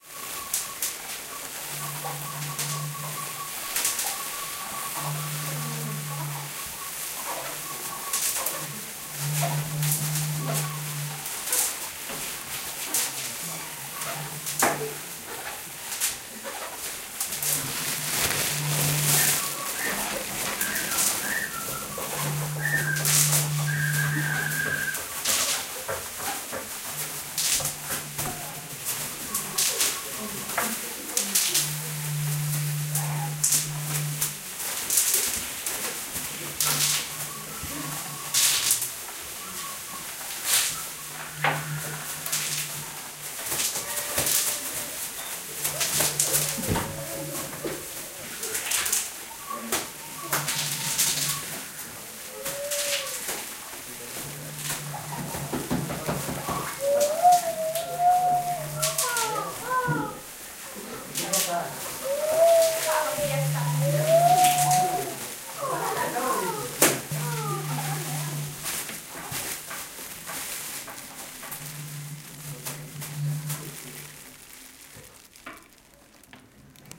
Grup Simon
This sound has been produced with different objects like tubes, bottles and plastics to imitate the sound of the wind that we have in our region: the Tramuntana wind. We are inspired by the picture "Noia a la Finestra" by Salvador Dalí, so we produce also the sound of the sea, the crunch of the boats and the windows, etc. This is the recording from one specific corner of the class. There are some more, so we can have as a result of this pack, a multi-focal recording of this imaginary soundscape. We recorded it in the context of a workshop in the Institut of Vilafant, with the group of 3rEso C.